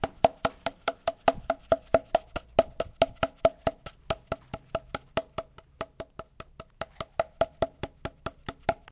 Here are the sounds recorded from various objects.